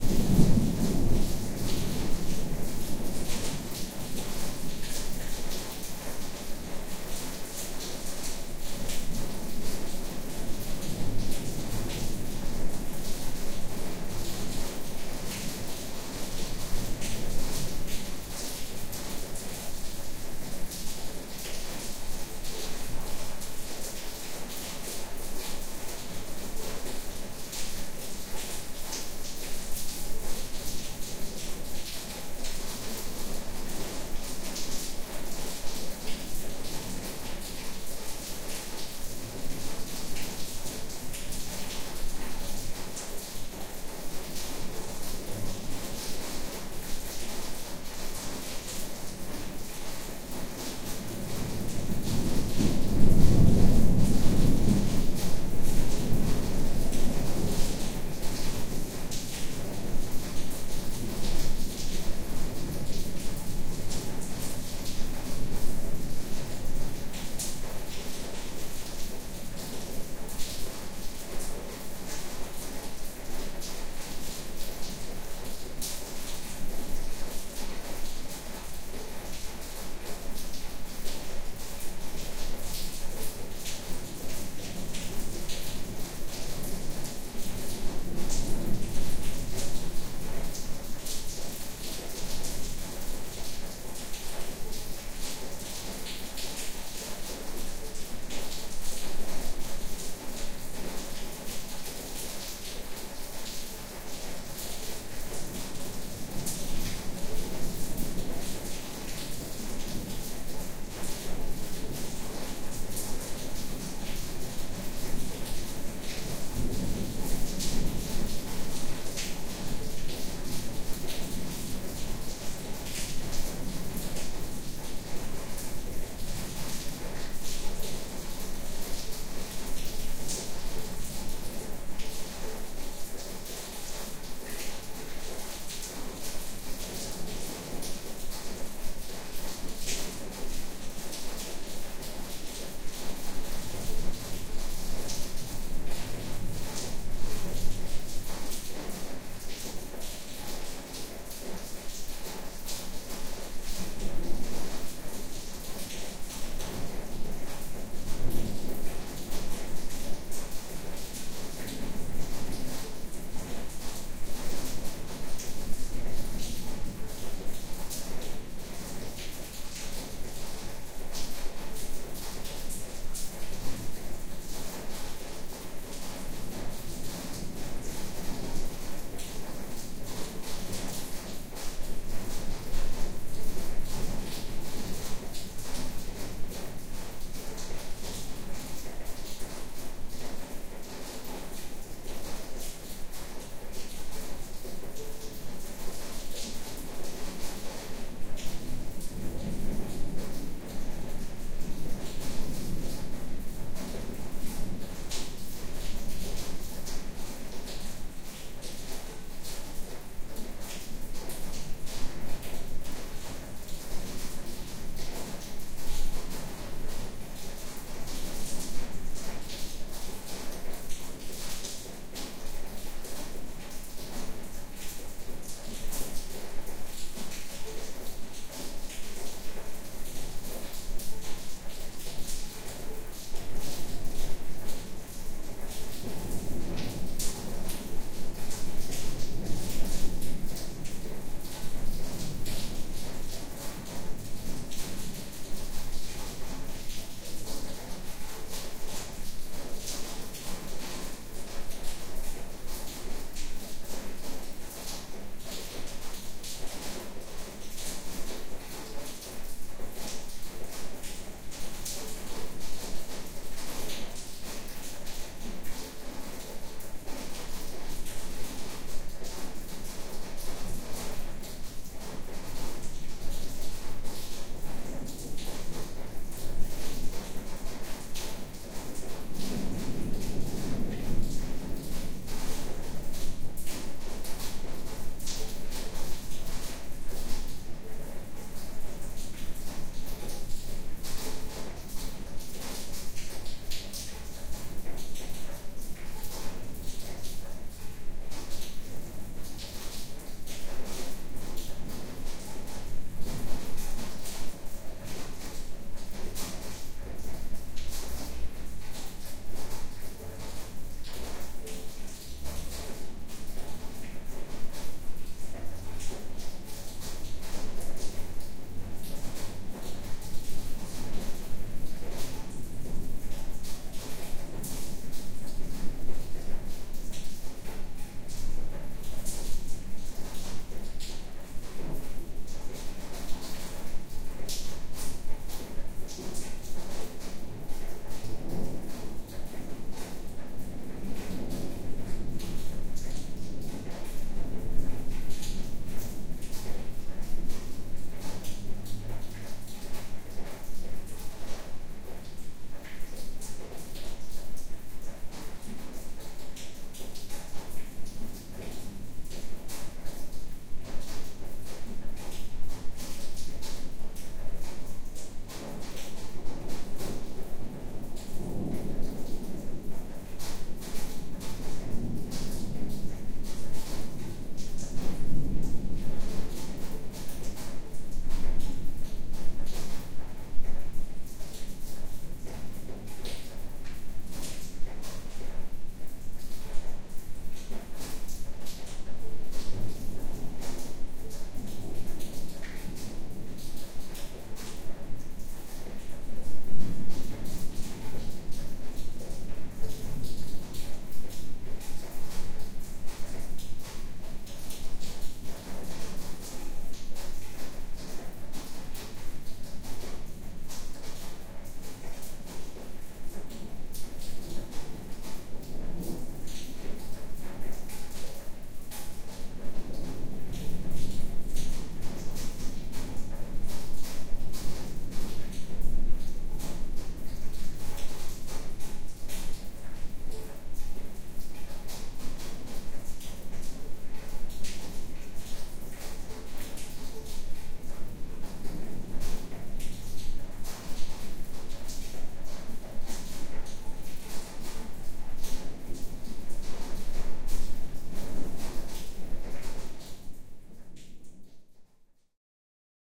Rain in Buenos Aires
Rain falling in a backyard (view from inside an adjacent bedroom).
Information about the recording and equipment:
-Location: Bedroom adjacent to a backyard with opened windows.
-Type of acoustic environment: Small, diffuse, moderately reflective.
-Distance from sound source to microphones: Approx 2.4m (to windows).
-Miking technique: Jecklin disk.
-Microphones: 2 Brüel & Kjaer type 4190 capsules with type 2669L head amplifier.
-Microphone preamps: Modified Brüel & Kjaer type 5935L.
-ADC: Echo Audiofire 4. (line inputs 3 & 4).
-Recorder: Echo Audiofire 4 and Dell D630C running Samplitude 10.
Eq: Compensation only for the response of the microphones (In this case for flat response at critical distance. Something between diffuse field response and free field response. See Brüel & Kjaer type 4190 datasheet).
No reverb, no compression, no fx.
Ambiance, Fx, Binaural